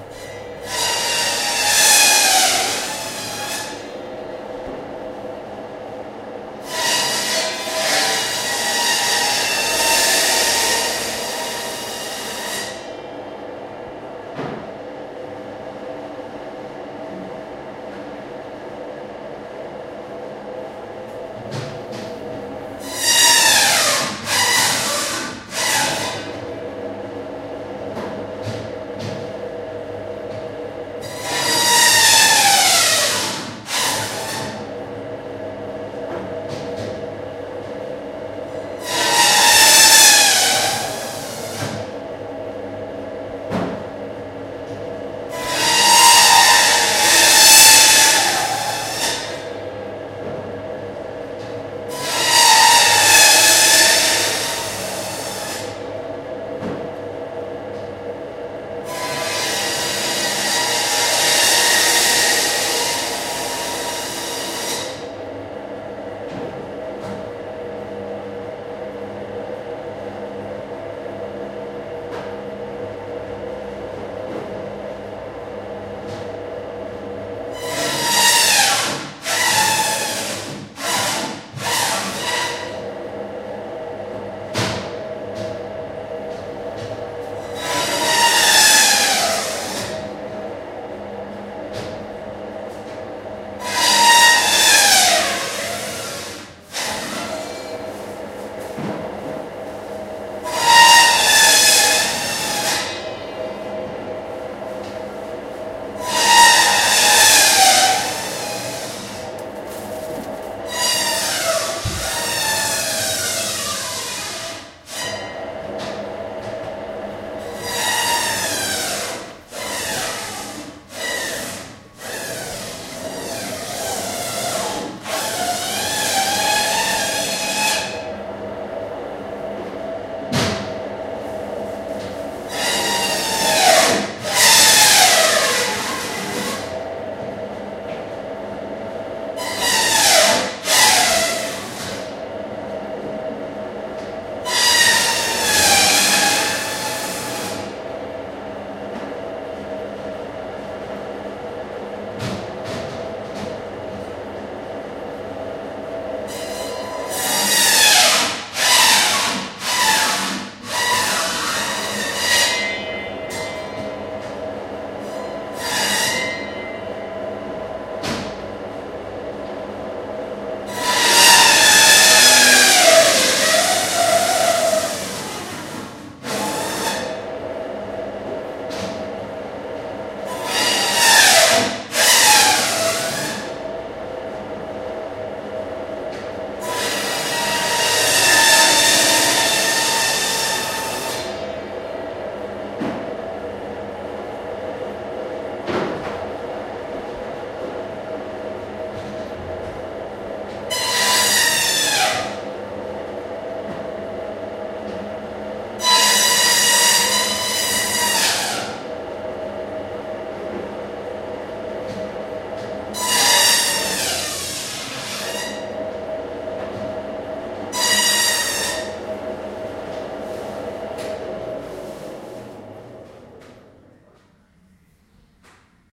saw the bones
Bones sawned in butcher's. You can hear putting the bone on the saw and the creak sound. Recorded with Zoom H1.
bone, butchers, saw, creak